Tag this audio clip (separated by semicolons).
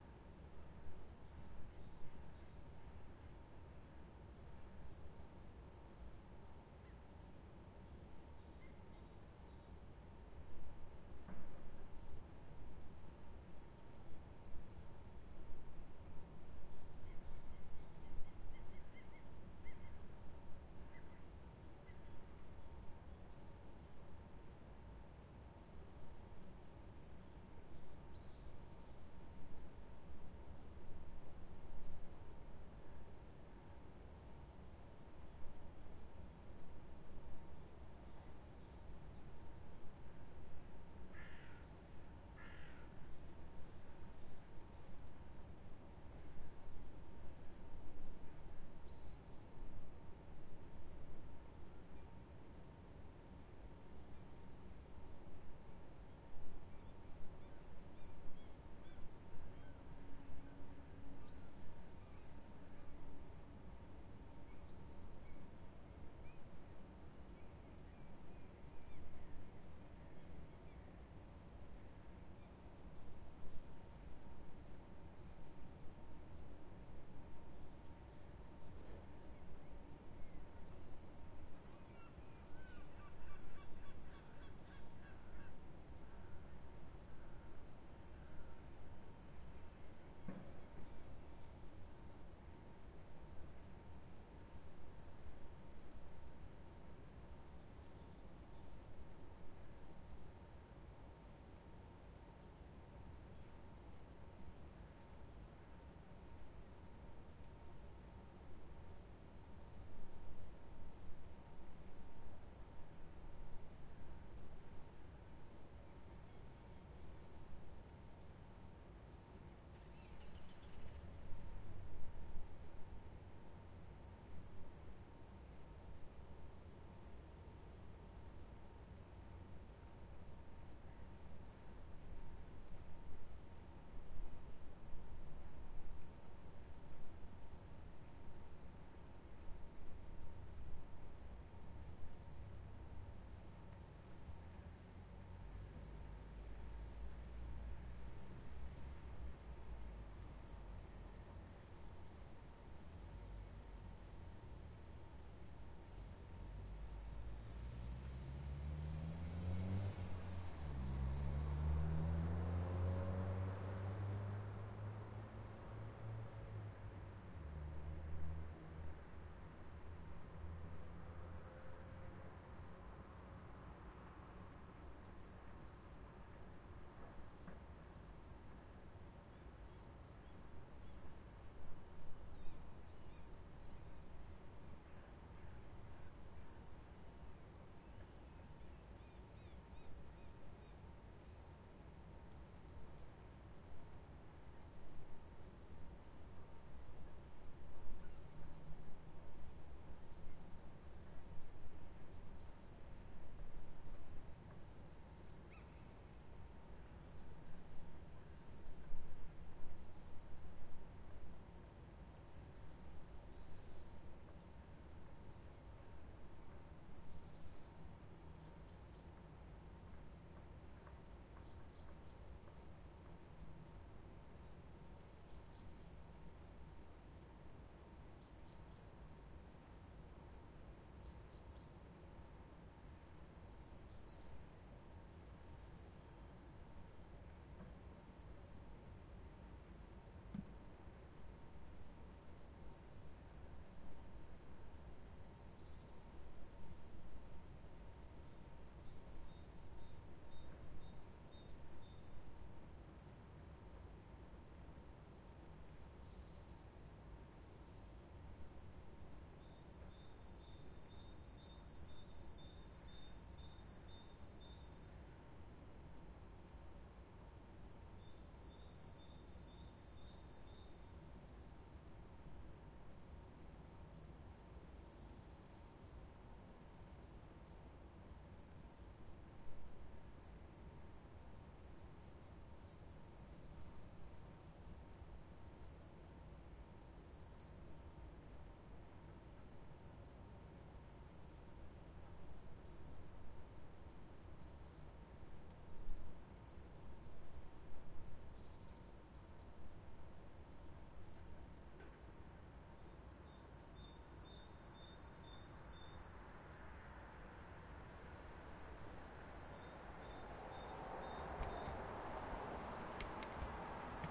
noise; dawn; cars; ambient; sunrise; soundscape; atmo; atmos; street; atmosphere; city; field-recording; urban; ambiance; background-sound; birds; summer; background; ambience